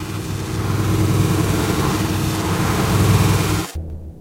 Sine wave created and processed with Sampled freeware and then mastered in CoolEdit96. Stereo simulation of mono sample stage one modulated with "hackeysacklarryb". Sounds like passing traffic meets pressure washing aluminum siding. This brings back painful memories...